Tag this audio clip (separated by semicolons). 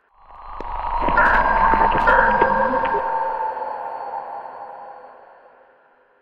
beacon; distress; radar; signal; sonar; space; spacecraft; spaceship; transmission